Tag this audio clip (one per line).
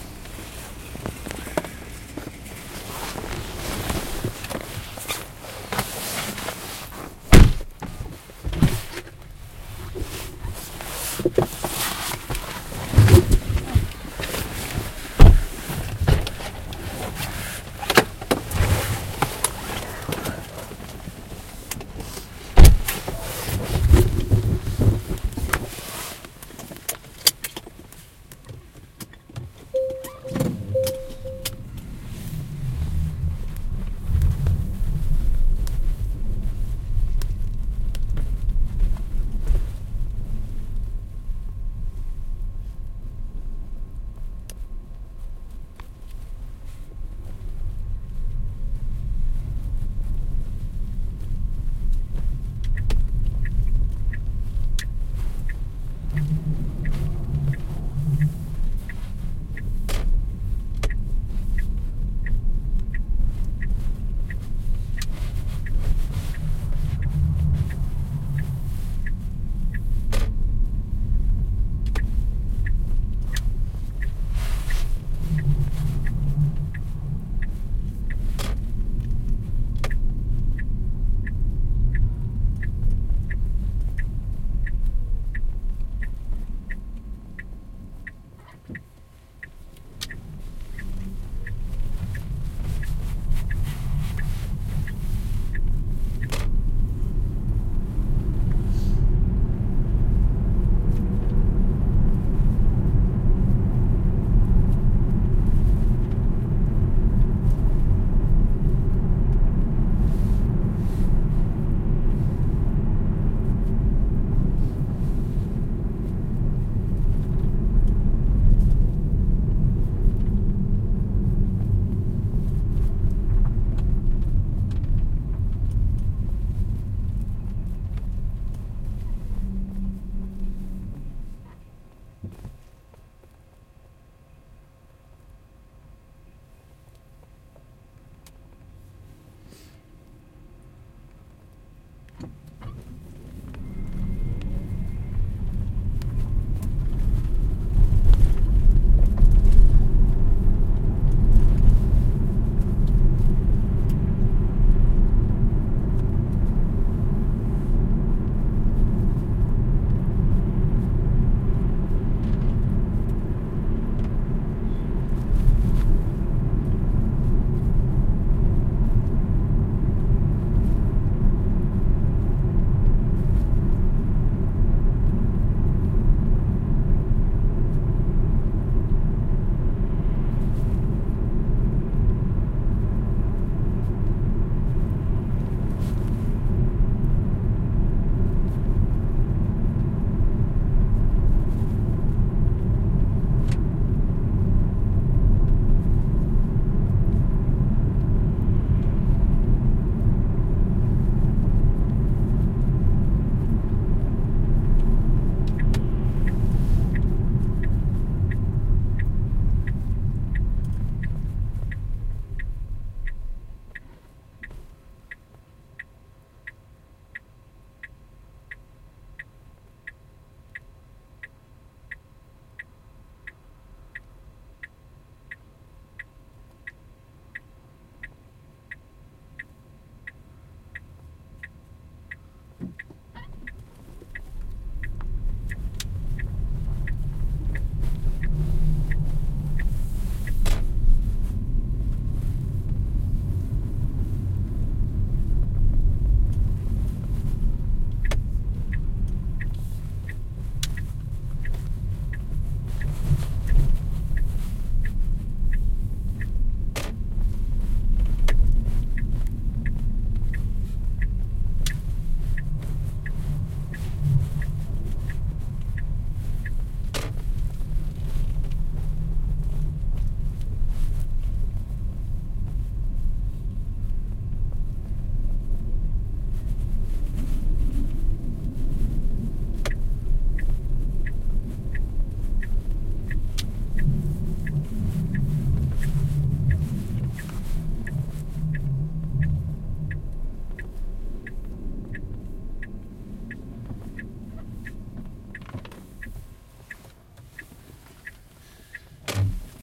electric-car
interior
driving
winter
field-recording